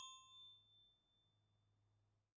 Recorded with DPA 4021.
A chrome wrench/spanner tuned to a C4.